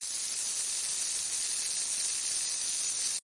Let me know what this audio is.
Sparkling water recorded with a Rode NT 5. I did two recordings and panned them hard left/right.
Preview sounds weird because of a conversion to mono.